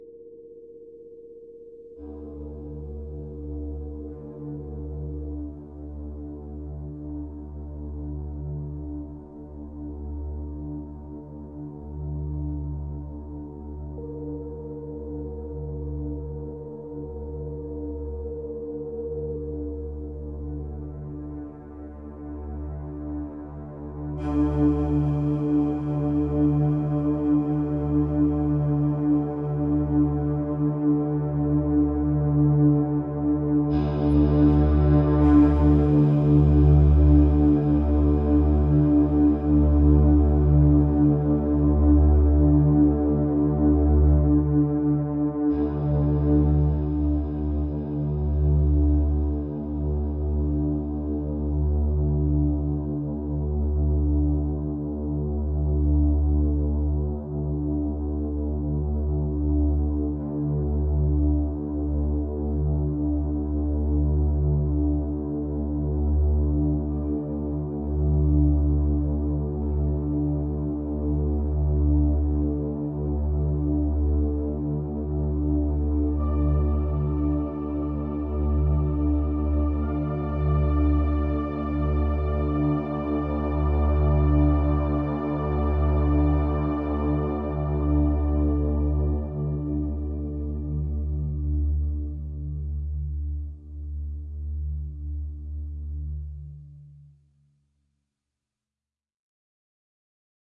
beneath ambient 2
this is one of the soundtrack cues that I completed for a psychological thriller, short.....the director cut all music but liked it- it is a good piece for background to smooth out visual and dialogue for a darker genre.
low, film, psychological, ambient, slow, sound, synth, atmospheric, orchestral, violin, score, background, soundtrack